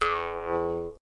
Jew's harp sigle hit